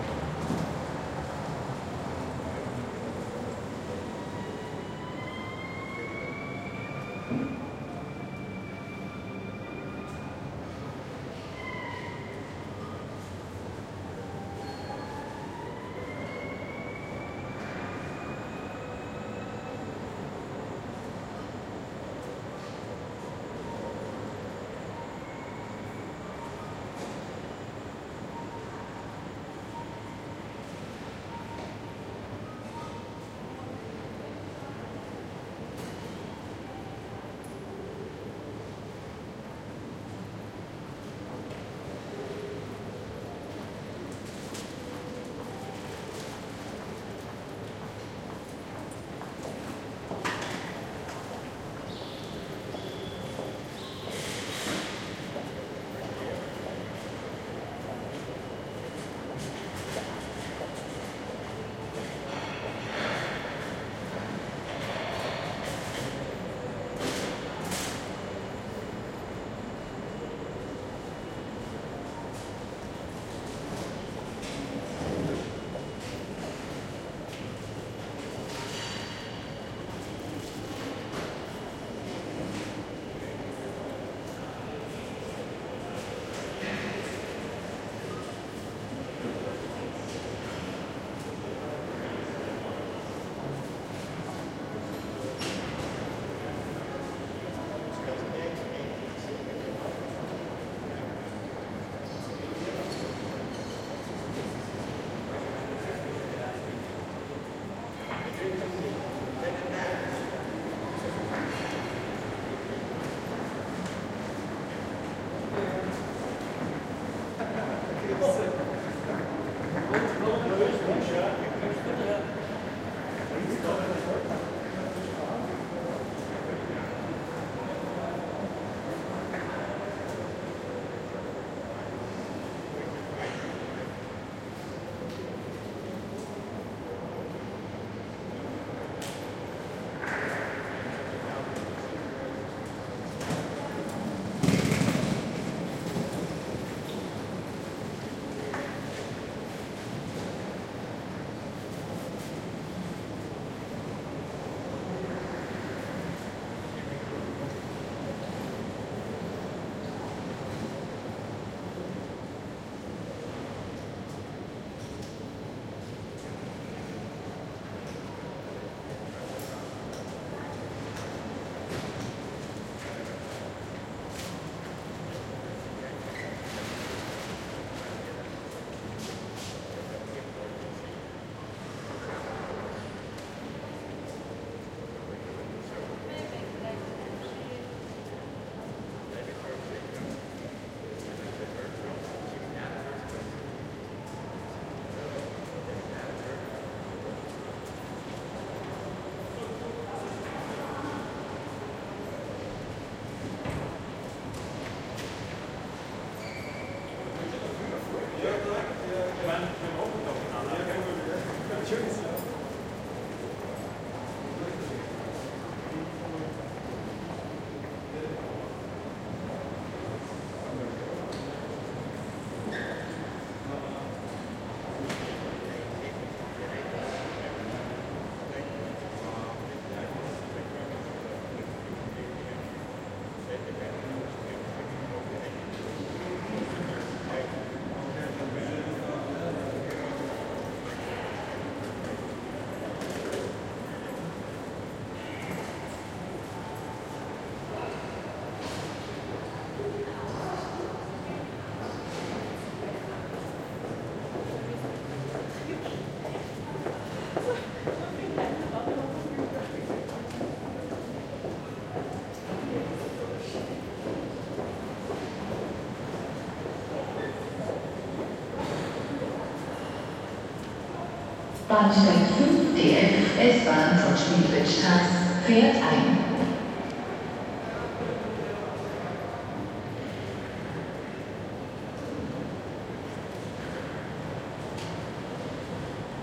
140814 Graz MainStationInt R
Surround field recording of the concourse in the main railway terminal in Graz/Austria. Trains can be heard arriving and leaving in the background, people talking and walking in the mid and near field. At the end of the recording (4:31) an arriving train is announced on the PA system.
Recorded with a Zoom H2.
These are the REAR channels of a 4ch surround recording, mics set to 120° dispersion.
ambiance, busy, city, Europe, field-recording, footsteps, Graz, hall, interior, PA, people, public, railway, train, urban